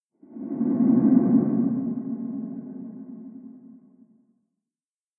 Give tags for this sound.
alien; animal; beast; fantasy; fi; fiction; future; futuristic; galaxy; game; ghost; horror; monster; planet; sci; science; science-fiction; sci-fi; scifi; space; wildlife

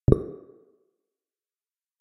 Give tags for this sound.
UI; Third-Octave; Click; SFX; Sound

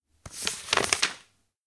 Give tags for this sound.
flick; flip; turn; page; magazine; turn-over; book; flipping; paper; read; reading; new-page

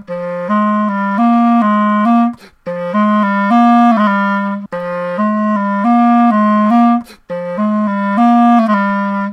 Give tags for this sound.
melody; clarinet

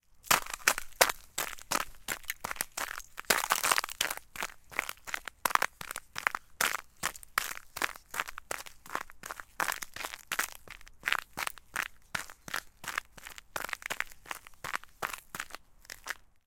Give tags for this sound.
step; running; ice; footstep; foot; footsteps; run; steps

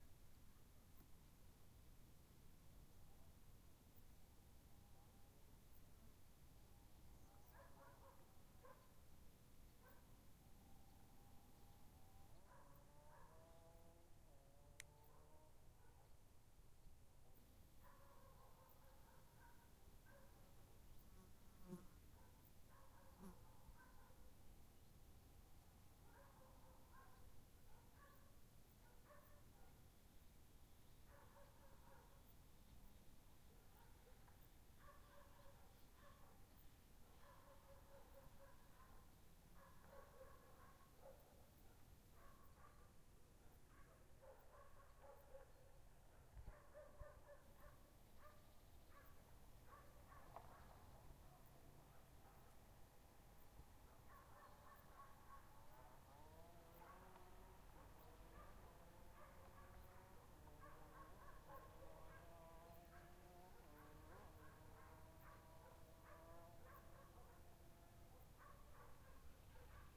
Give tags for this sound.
atmosphere; atmo; atmos; village-edge; background; background-sound

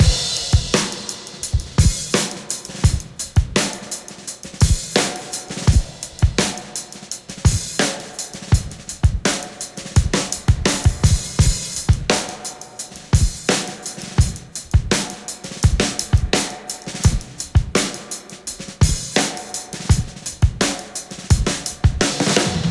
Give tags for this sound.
break-beat; breakbeat; drums; fills; long; spring-reverb

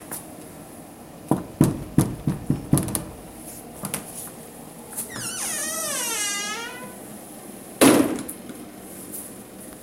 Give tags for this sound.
Door; knock; open; woodendoor

